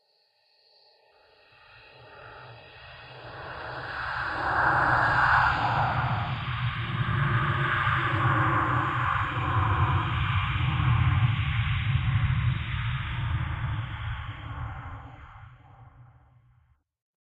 Ghostly screams and voices passing by.
creepy, scary, unearthly, voices